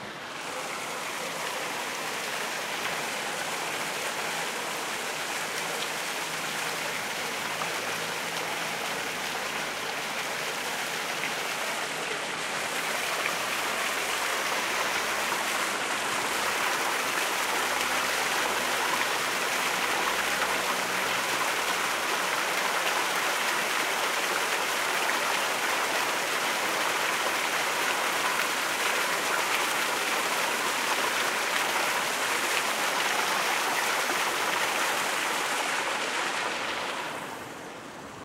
FX - fuente, ornamentacion